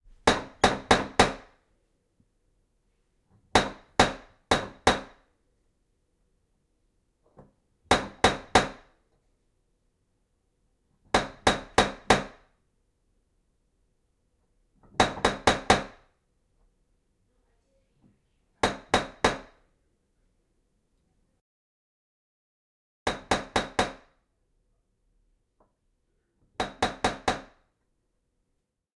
180604 Door knocks, brass knocker, foley
Various knocks with brass knocker on wooden front door of house.
door, foley, brass, knocker